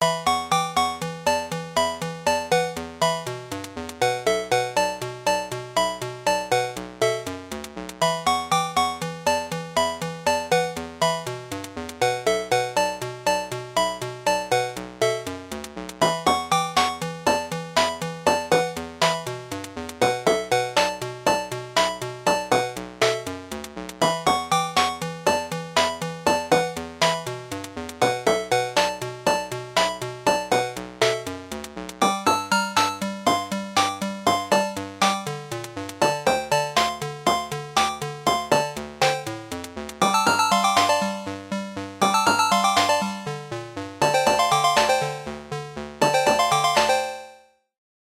Let Me See Ya Bounce (8-Bit music)
"Let Me See Ya Bounce" is an 8-Bit-style music piece that brings back the retro game vibes. Purely synth-crafted.
It is taken from my sample pack "107 Free Retro Game Sounds".
8bit, audio, game, music, retro